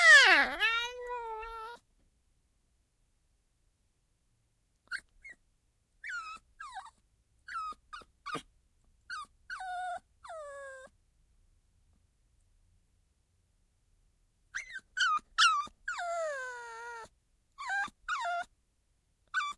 puppy 2.5months cute squeal
2, 5months, cute, puppy, squeal